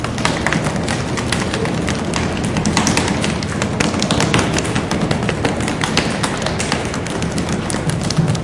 OM-FR-stairrail
Ecole Olivier Métra, Paris. Field recordings made within the school grounds. Someone taps the stair rail.
France, Paris, recordings, school